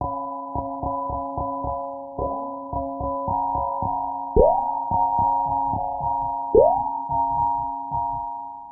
110 bpm
This lil' tune was made in FL Studio 12.4 with a rammerdrum sample, gross beat plugin and some compression plugins...
warm, melodic, ambient, loop, chillwave, atmospheric, chillout